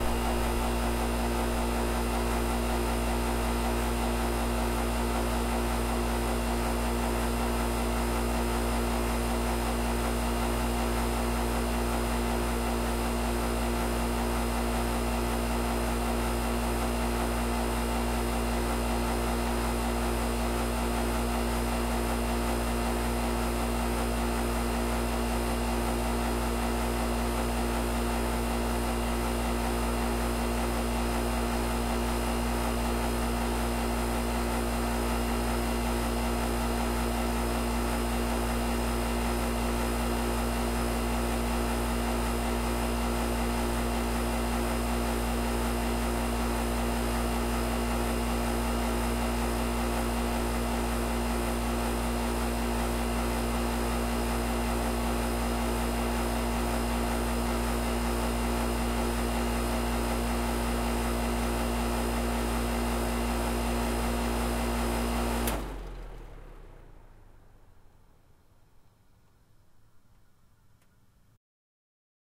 A recording of a trailer AC unit running, and shutting off at the end. Sound kinda neat. Zoom H4N.